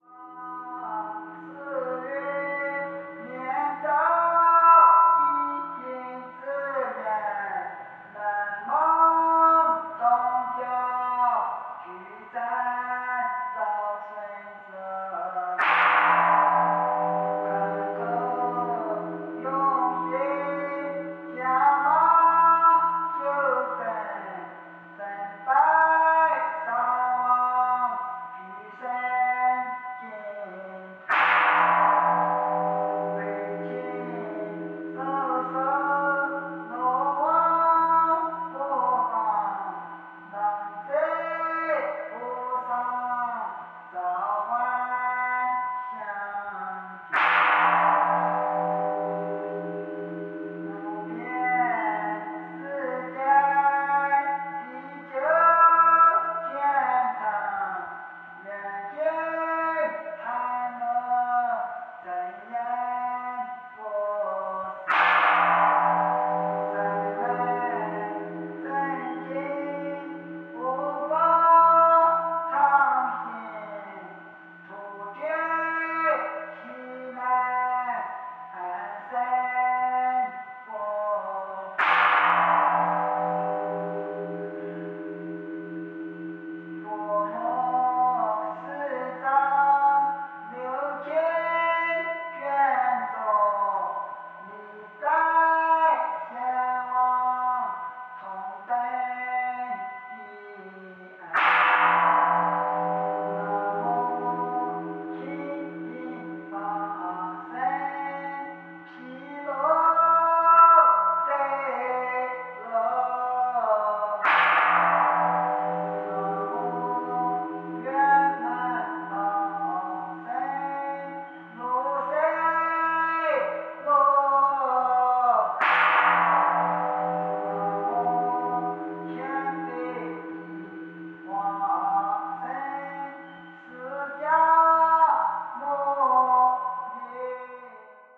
Monk chanting night song and playing gong in buddhist temple in Emei Shan (processed)
bell, buddhist, chant, chanting, china, emei, field-recording, gong, monk, night, practice, religious, shan, singing, song, temple, tibetan, vocal